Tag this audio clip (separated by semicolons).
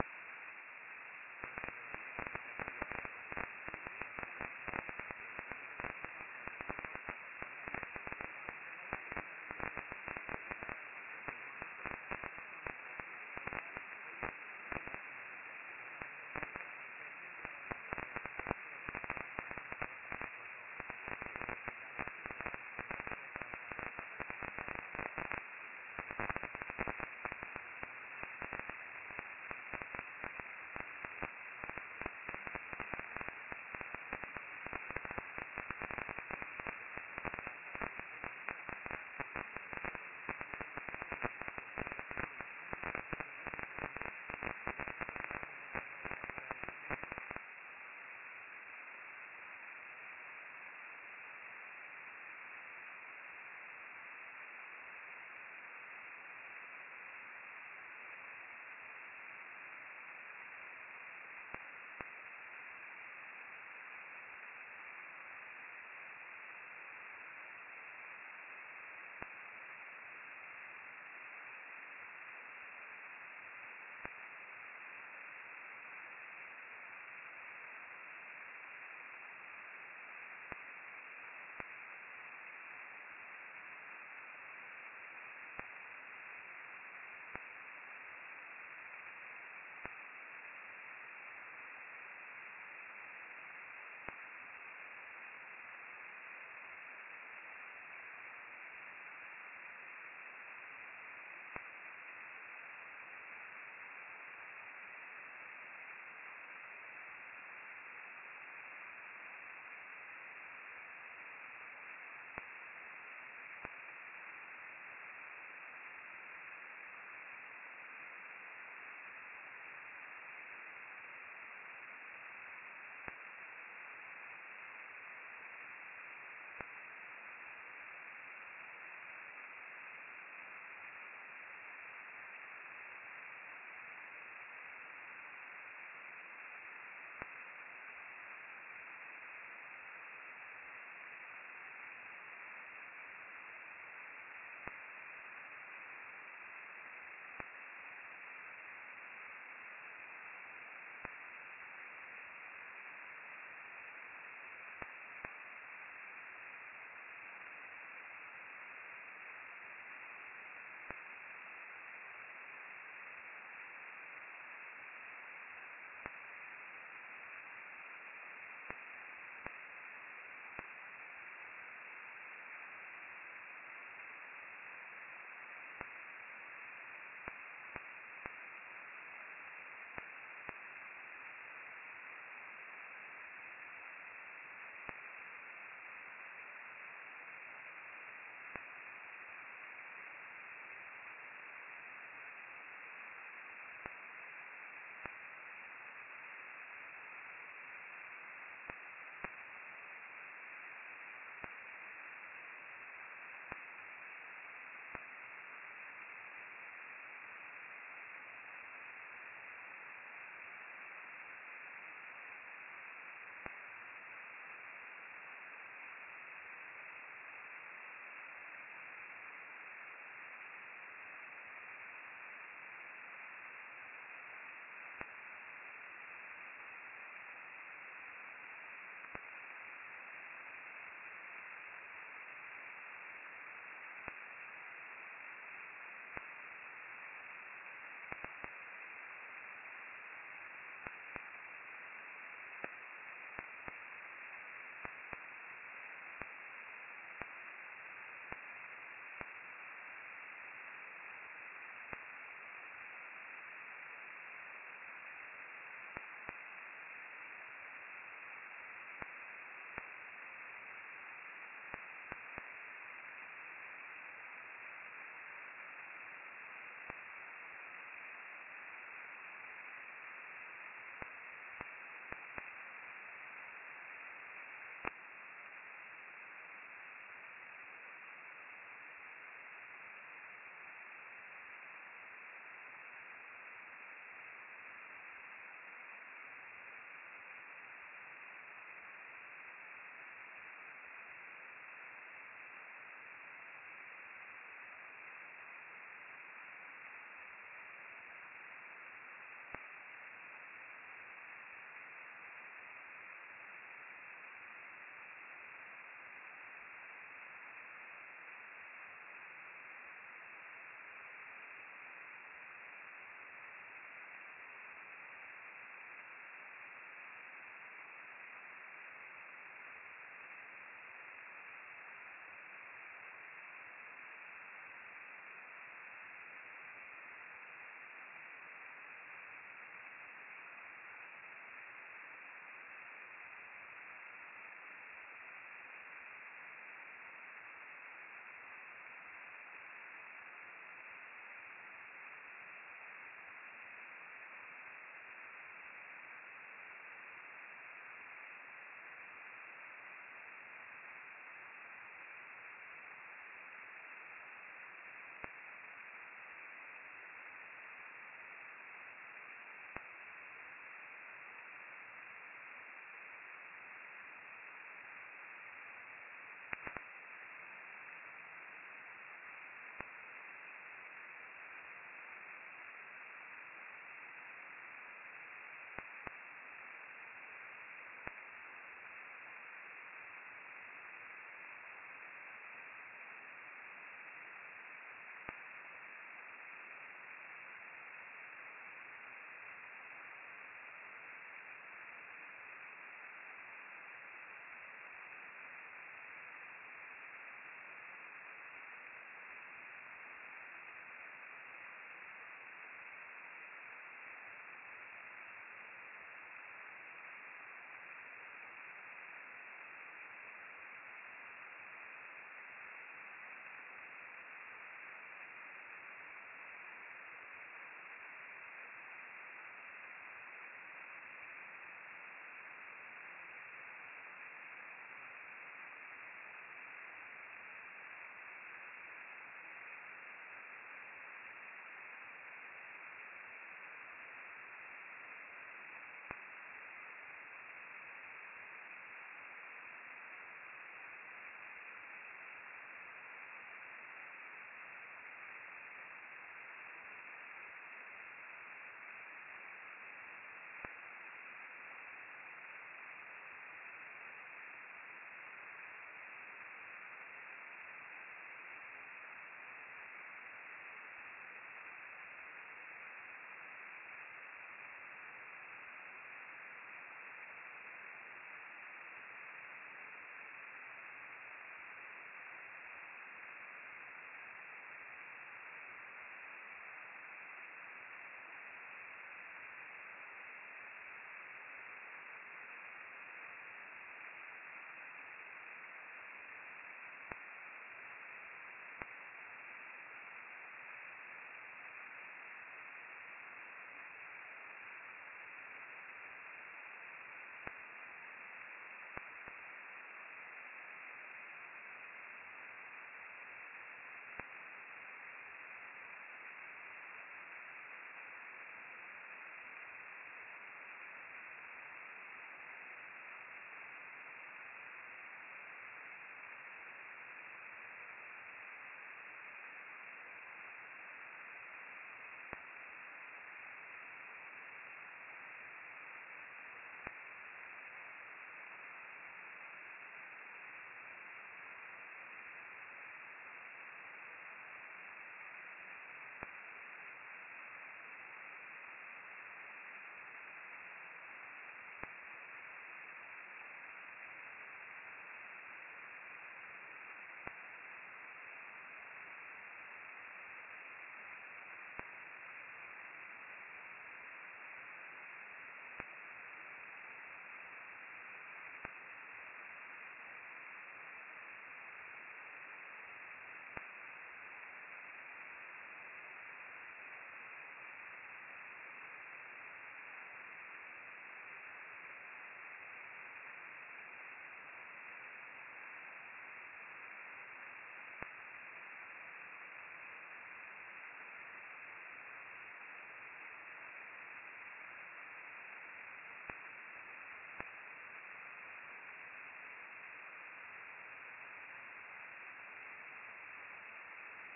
fountain; noaa; satellite